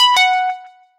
vOpt SourCream
Short noise/ sound for notifications in App Development.
The sound has been designed in Propellerhead's Reason 10.
sounds, app, notification, development, click, chime, Ring